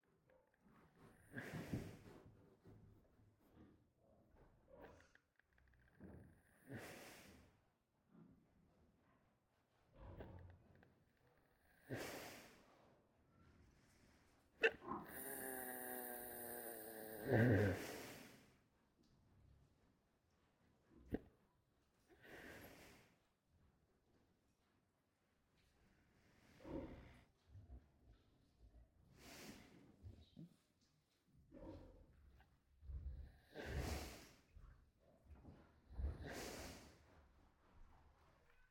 Pig Preagnant Snores
Big pig snoring and heavy breathing in barn, recorded at Kuhhorst, Germany, with a Senheiser shotgun mic (sorry, didn't take a look at the model) and an H4N Zoom recorder.